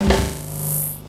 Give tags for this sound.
analog
drum
glitch
lofi
noise

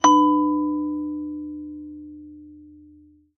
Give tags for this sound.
bell
clear
ding
ping
pure
ting

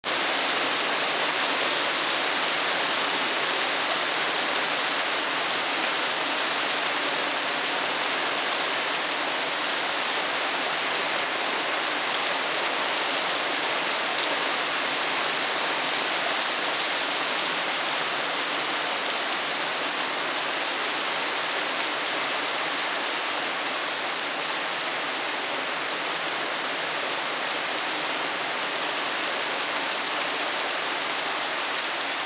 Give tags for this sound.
ground
hard
Pouring
Rain
Raining

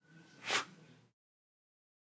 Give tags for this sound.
Foley
Move
Weapon

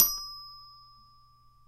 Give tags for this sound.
instrument
multisample
xylophone

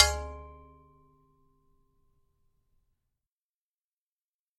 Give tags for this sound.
sword
metal
metallic
field-recording
ping

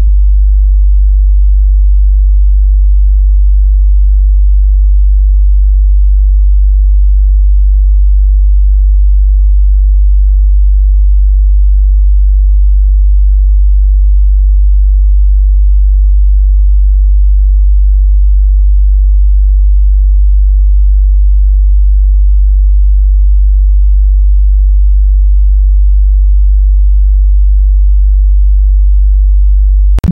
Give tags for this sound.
Noise
Sound
Deep
Bass

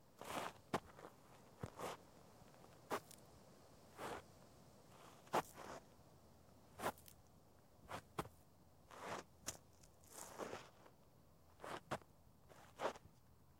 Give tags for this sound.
dirt
feet
foley
foot
footstep
footsteps
ground
running
shoes
step
stepping
steps
tennis
tennis-shoes
walk
walking

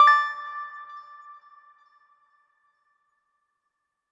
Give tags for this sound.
achievement
application
beep
bleep
blip
bootup
click
clicks
correct
design
effect
fx
game
gui
hud
interface
intro
intros
menu
positive
sfx
soft
soft-beep
soft-click
sound
startup
success
ui

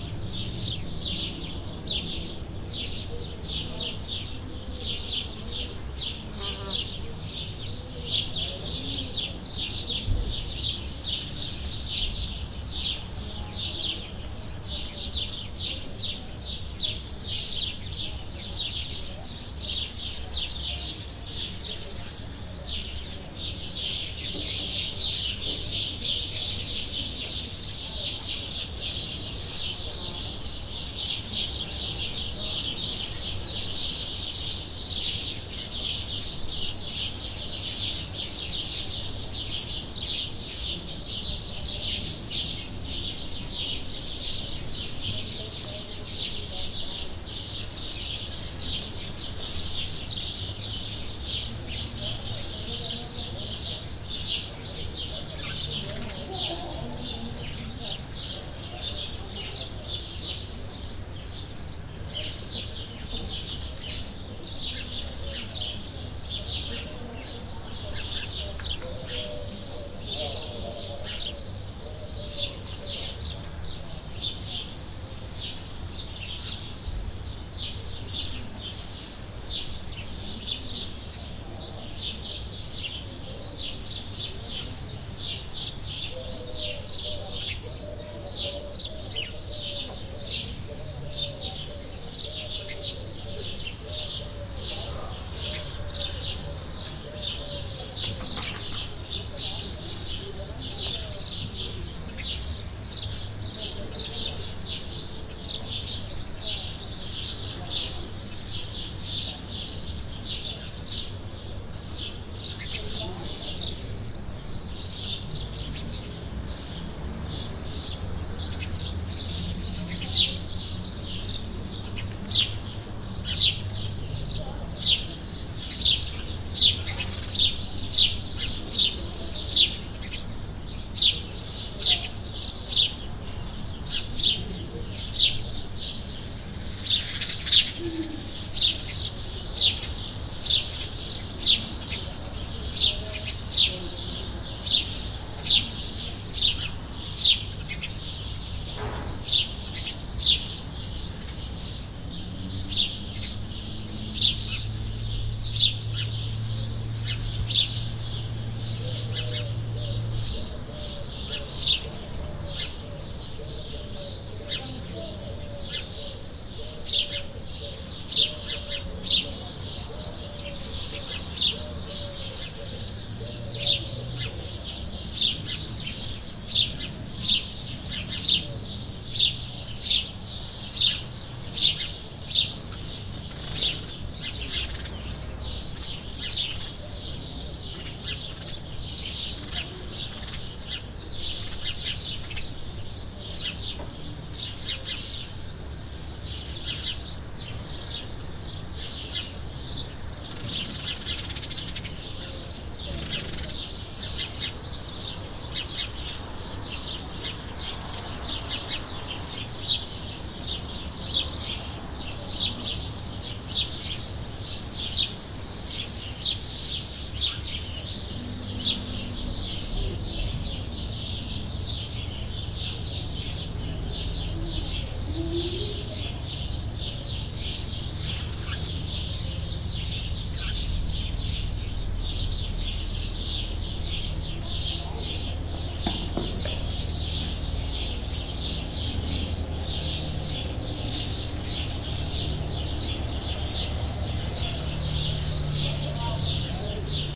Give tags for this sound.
ambiance
ambient
bird
countryside
field-recording
forest
nature
village

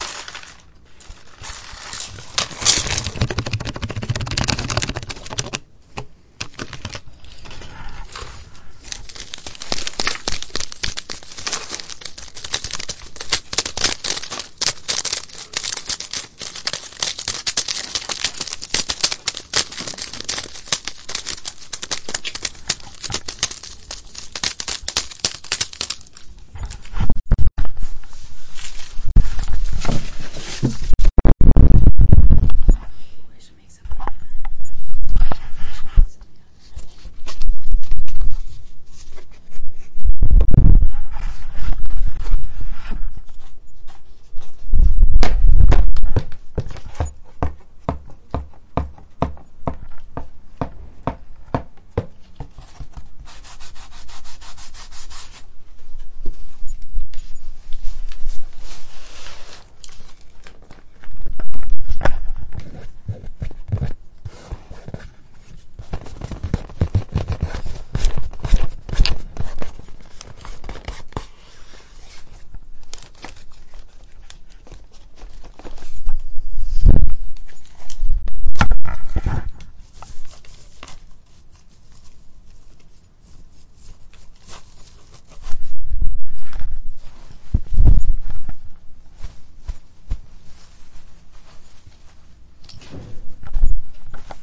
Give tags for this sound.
impactnoise
notebook

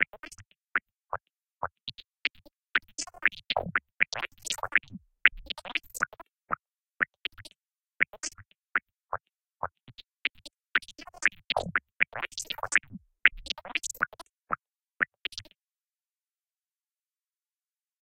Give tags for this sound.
voice-like
bleeps
sound
alien

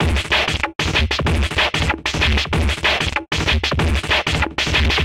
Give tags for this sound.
ambient
background
d
dark
dee-m
drastic
ey
glitch
harsh
idm
m
noise
pressy
processed
soundscape
virtual

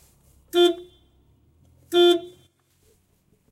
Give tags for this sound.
CZ
Czech
Panska
car
horn

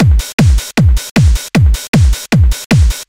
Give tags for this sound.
dance
drums
hard
loop
percussion

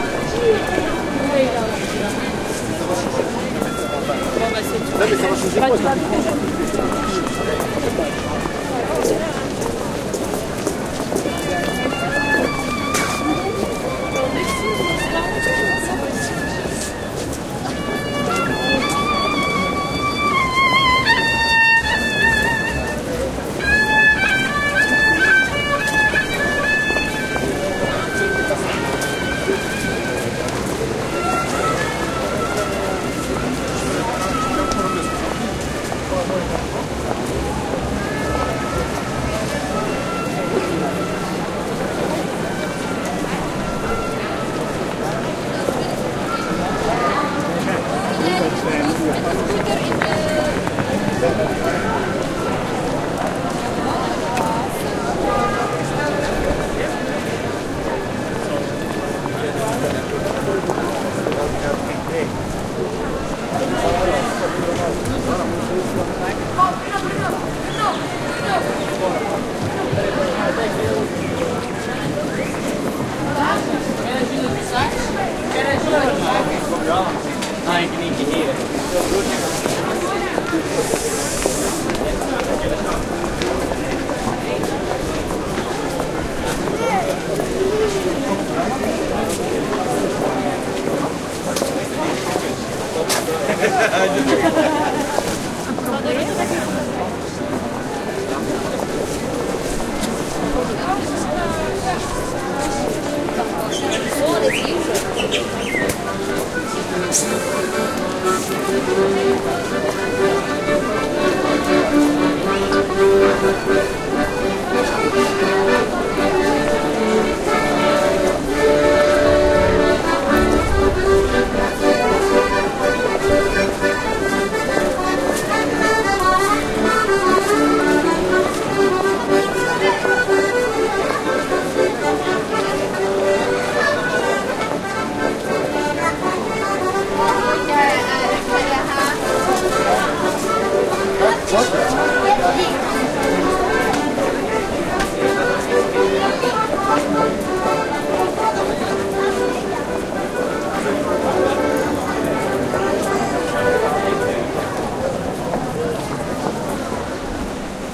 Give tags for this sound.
antwerpen
ambiance
music

